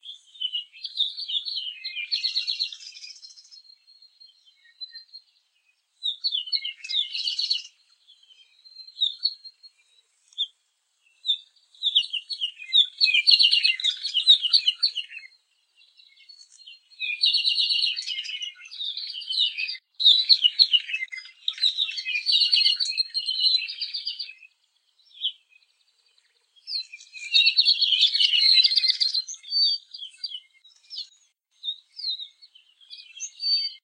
Bird sounds, mostly 'apapane, recorded on the edge of Kilauea Caldera on the island of Hawai'i.
Created on an iPhone 4S using TinyVox Pro. Samples trimmed and normalized, but otherwise unprocessed, using Audacity on a MacBook Pro. Recorded on Halema'uma'u Trail inside of Hawai'i Volcanoes National Park on 30 Nov 2013 at about 2:00 pm.
apapane, birdsong, field-recording, hawaii